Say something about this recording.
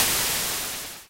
Dust fx
This is a sound effect I created using ChipTone.
chiptone, digital, dust, effect, fx, gravel, hit, impact, noise, particles, retro, sand, sfx, step